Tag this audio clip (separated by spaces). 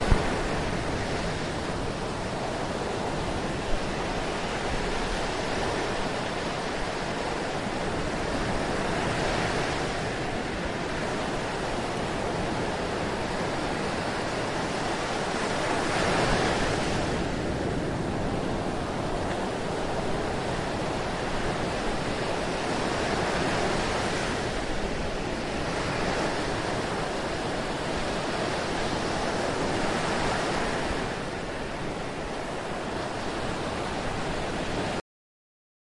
beach coast field-recording foam ocean sea seaside shore surf water waves